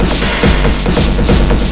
140bpm Jovica's Witness 1 5
electro, weird